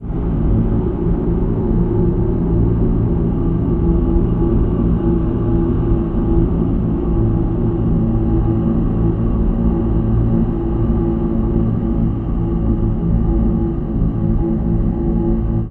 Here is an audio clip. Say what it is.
Atmospheric sound for any horror movie or soundtrack.
Atmosphere, Evil, Freaky, Halloween, Horror, Scary, Terror